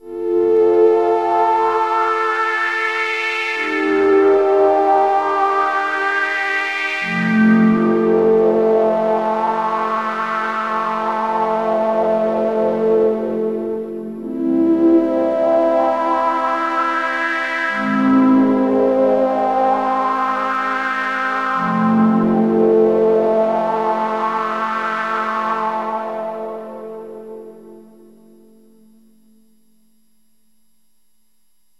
a freehanded introplay on the yamaha an1-x.
an1-x, freehand, played